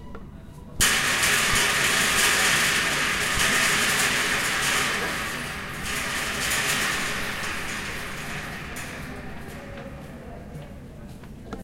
paisaje-sonoro-uem-libro-paula
Sonido de muchos metales, simulando como que se caen, podría utilizarse para una fabrica o alguna escena de estrés en la que se cae todo lo que esta a tu alrededor
uem, Metales, Sonido